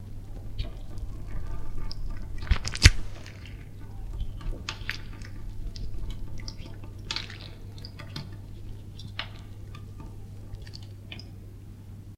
note: these samples maybe useful for horror media.
smiles to weebrian for the inspiration, the salads on me (literally)
(if this sound isn't what you're after, try another from the series)
limbs, neck, horror-fx, fx, squelch, break, leg, effects, flesh, torso, bones, horror-effects, horror, arm